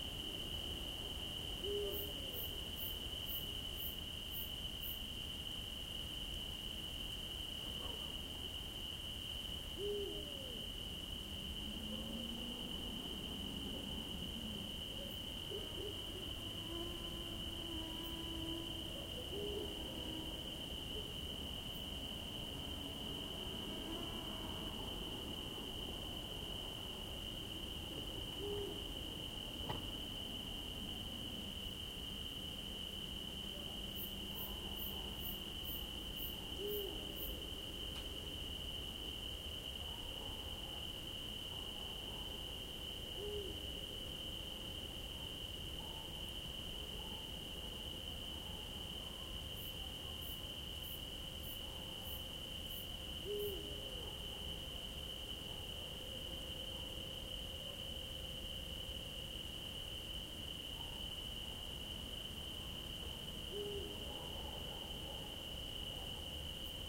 This was recorded late at night inside Pine forest. No Nightjars now, just a soft cricket chorus, some mosquitoes, and a distant owl (you have to listen carefully).Rode NT4 > Shure FP24 > iRiver H120(rockbox). The site was so quiet that I had to crank up the mic pre.